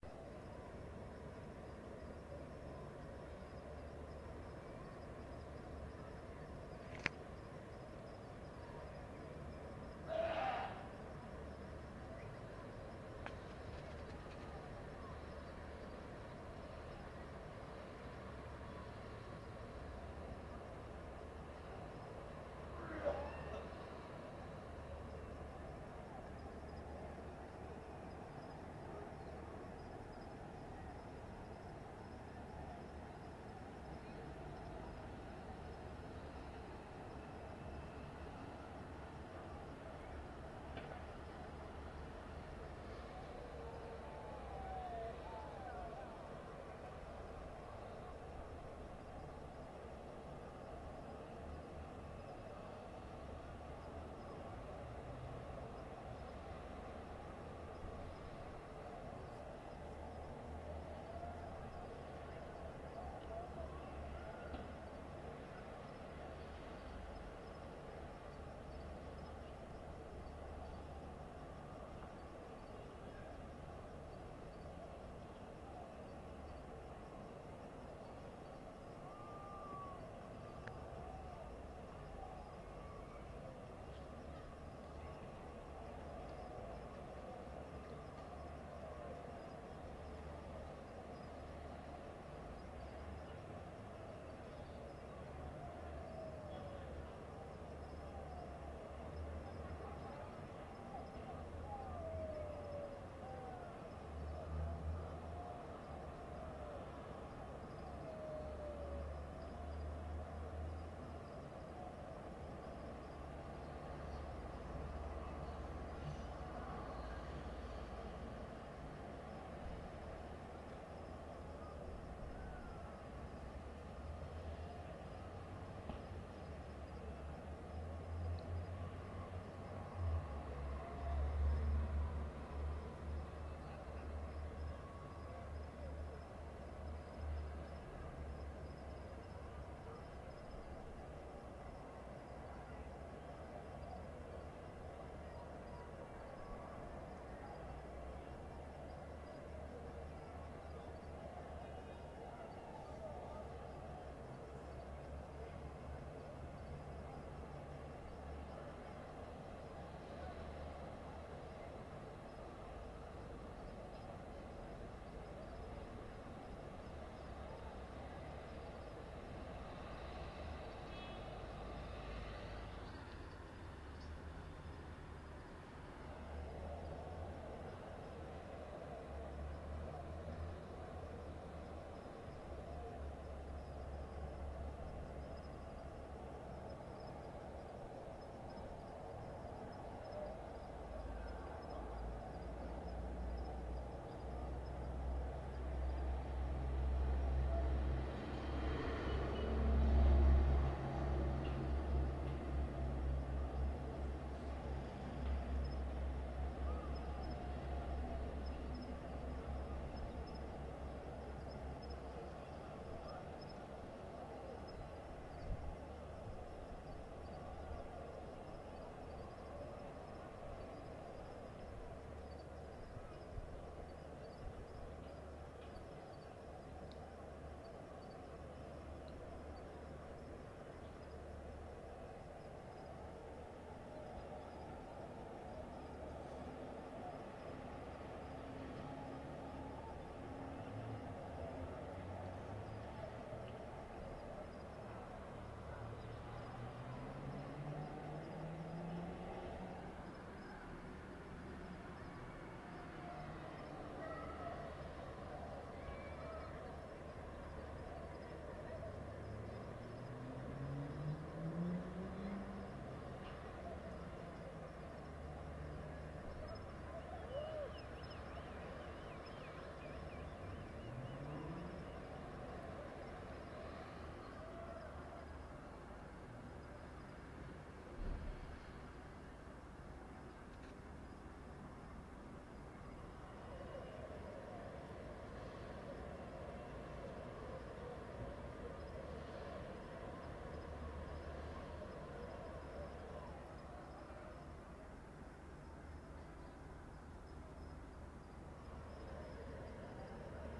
Yours&Owls1
Yours & Owls festival 2 minutes after closing time. Stuart Park, Illawarra Region, NSW, Australia, 2 Oct 2016.
atmosphere, music, Ambiance, electronic, electro, Festival, far-away